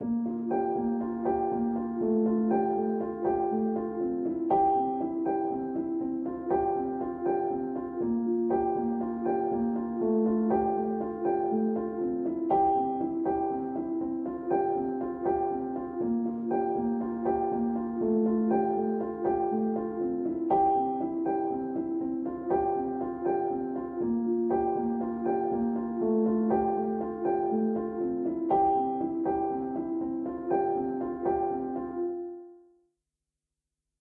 Piano loops 013 octave down short loop 120 bpm
Piano, bpm, free, 120bpm, reverb, 120, loop